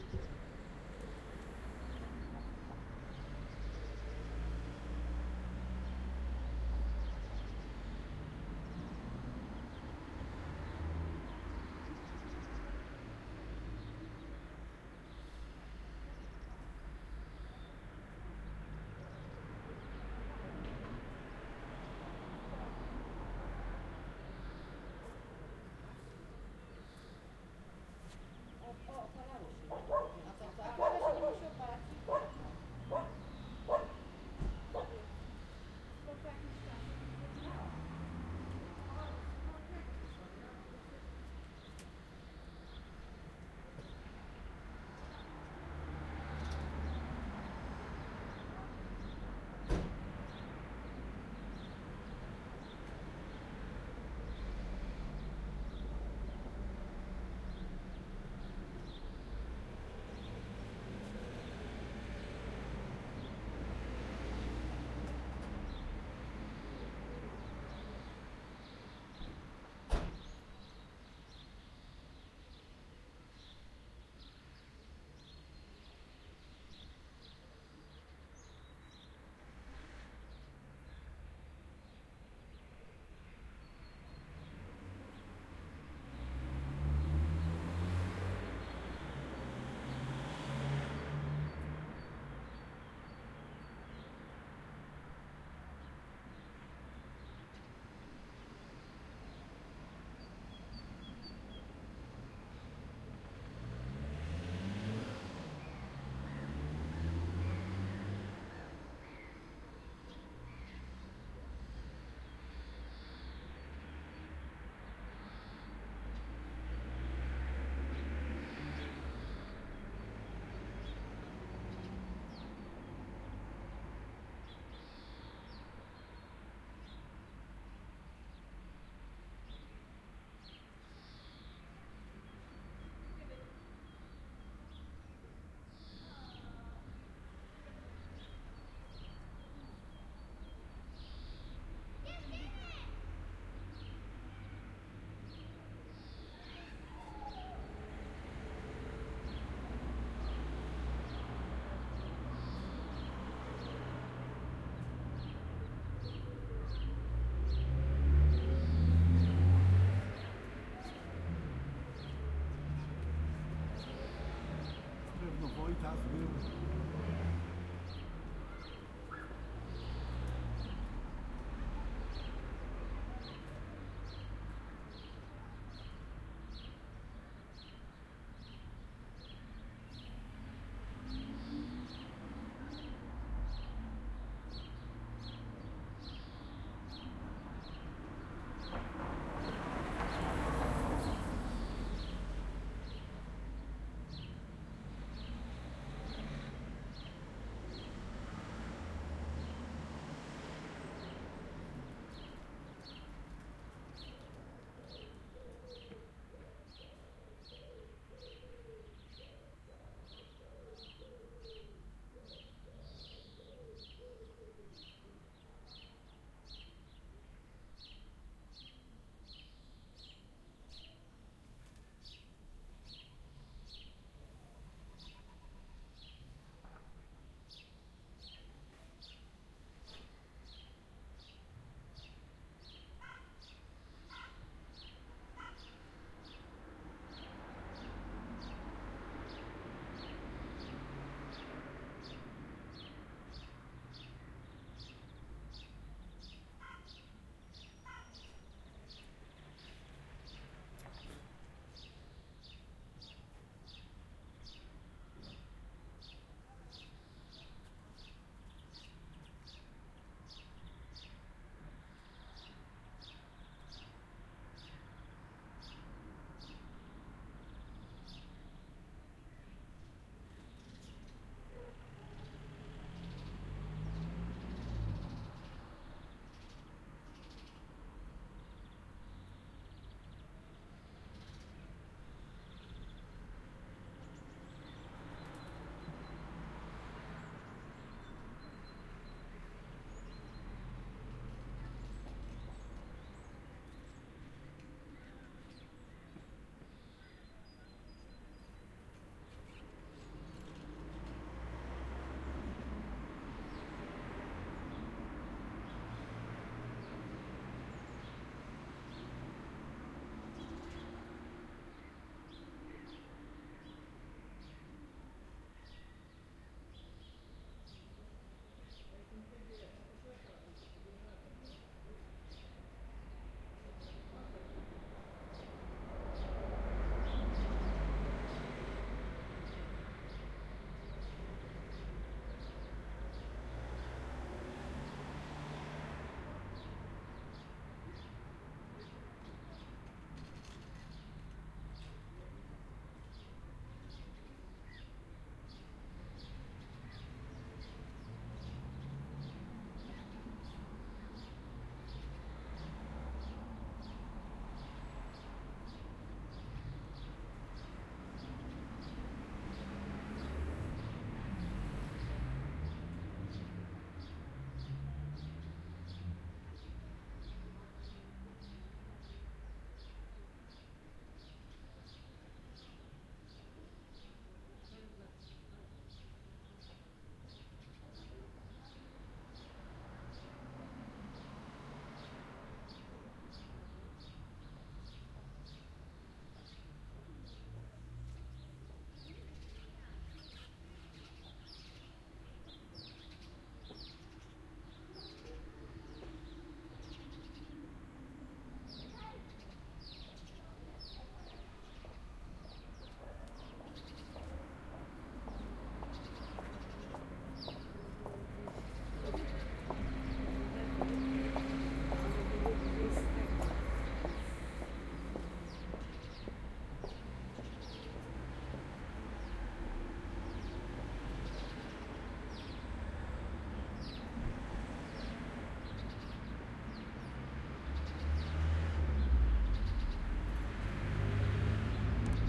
Neighborhood Afternoon Life (#1 field recording) 28 Mar 2017
Recorded with Zoom H4n in the suburban neighborhood of Świnoujście, Poland.
ambiance, ambience, ambient, atmophere, atmos, atmosphere, atmospheric, background, background-sound, field, general-noise, h4n, recording, smalltown, soundscape, town, white-noise, zoom, zoomh4n